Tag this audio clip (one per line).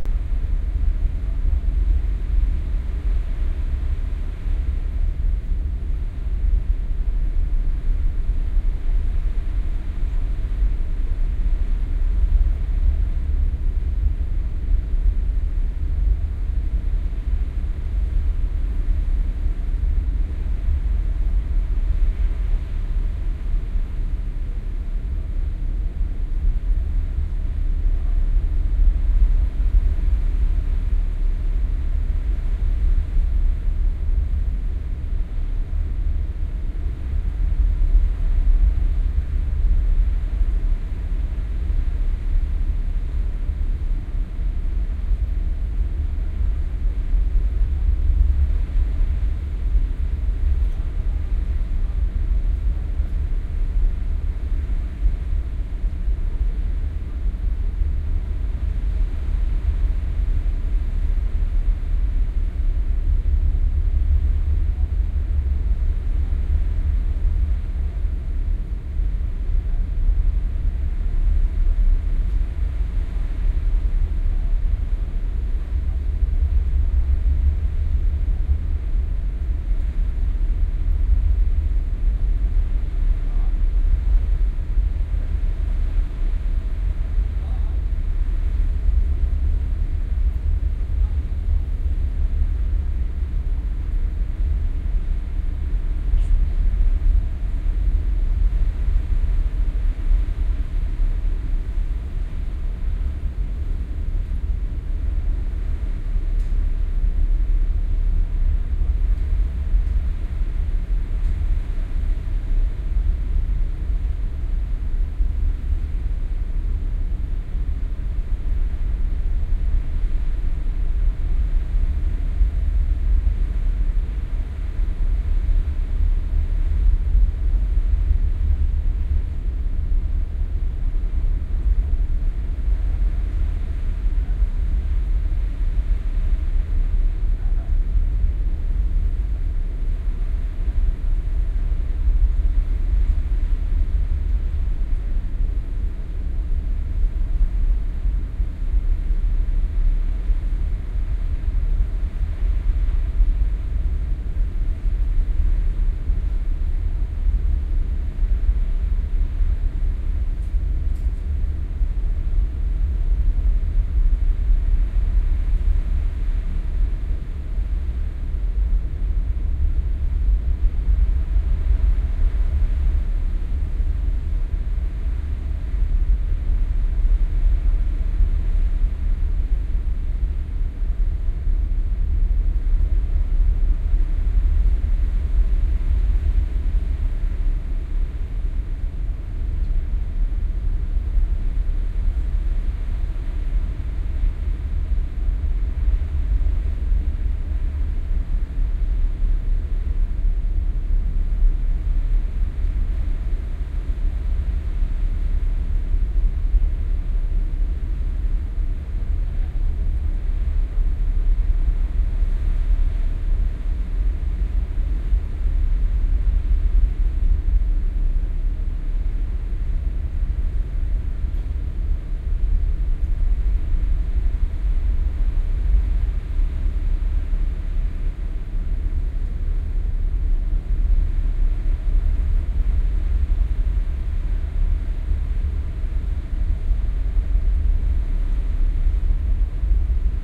ferry
deck
field-recording
water
northsea
ship
binaural